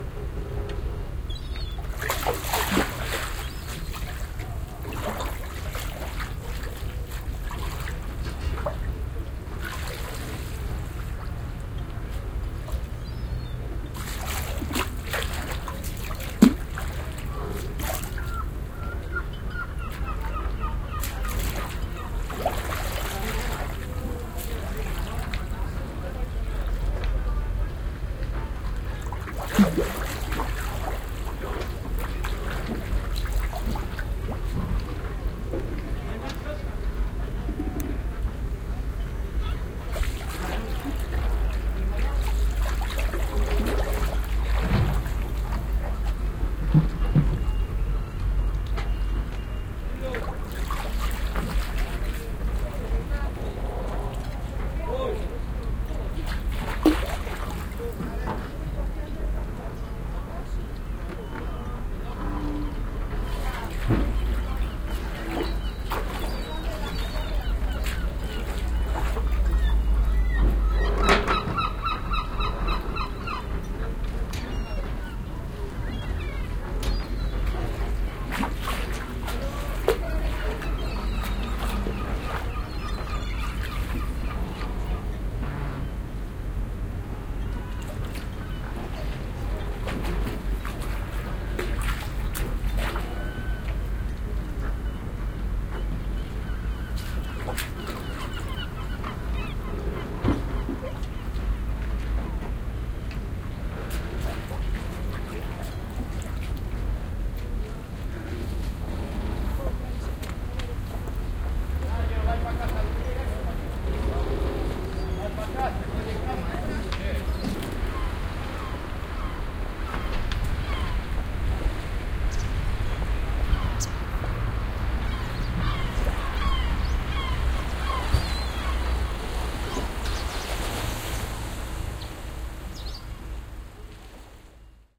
Puerto de Baiona
Binaural, Field-recording, Mar, Paisaje-Sonoro, Sea, Soundman-OKM, Soundscape, Vigo